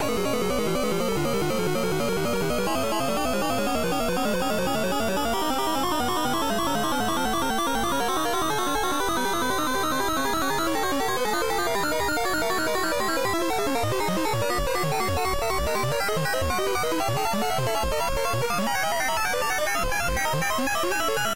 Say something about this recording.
Arcade Game
8bit sounds from a vst
1980s, 8, analog, application, bit, chiptune, command, computer, data, electronic, game, intelligent, machine, mechanical, robot, robotic, Sounds, space, spaceship, spoken, toy, windows